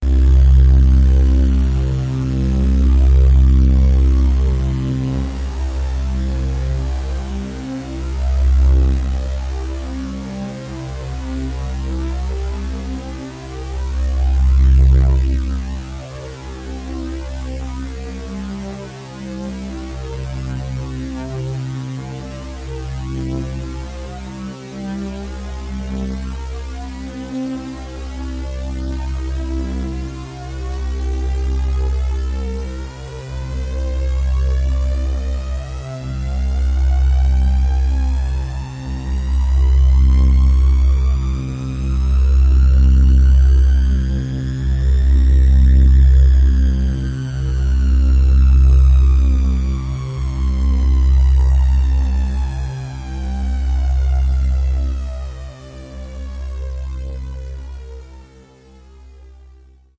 Q Saw LFO-ed filter sweep - C2
This is a saw wave sound from my Q Rack hardware synth with a low frequency filter modulation imposed on it. Since the frequency of the LFO is quite low, I had to create long samples to get a bit more than one complete cycle of the LFO. The sound is on the key in the name of the file. It is part of the "Q multi 004: saw LFO-ed filter sweep" sample pack.
synth, saw, electronic, multi-sample, waldorf, filtered